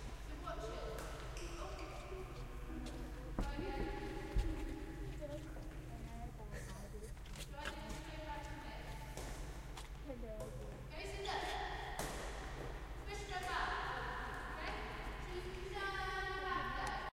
This is a sonic snap of a badminton game recorded by Jordan at Humphry Davy School Penzance